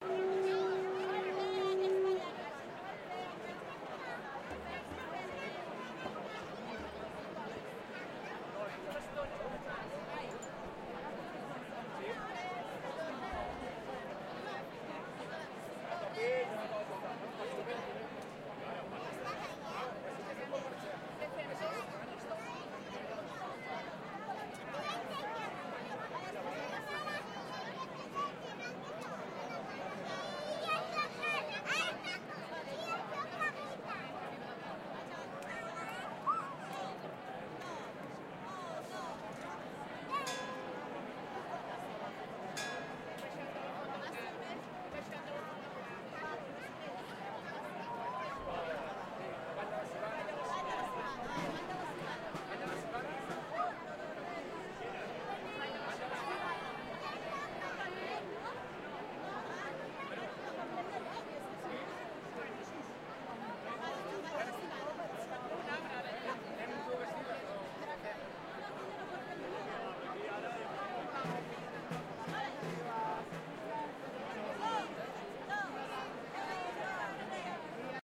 ambience mid crowd ext sant celoni
ambience, celoni, crowd, ext, mid, people, sant